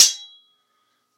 Sword Clash (46)
This sound was recorded with an iPod touch (5th gen)
The sound you hear is actually just a couple of large kitchen spatulas clashing together
strike, impact, iPod, clang, steel, ding, clanging, clashing, clank, struck, metallic, ringing, stainless, ping, knife, hit, ring, slashing, ting, metal-on-metal, metal, clash, swords, sword, slash